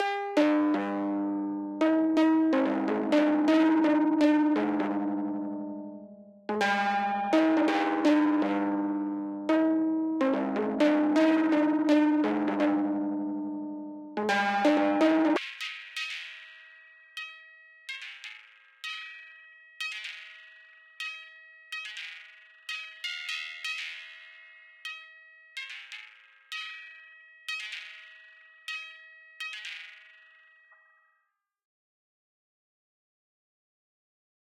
A melancholy synth loop that becomes filtered and distant.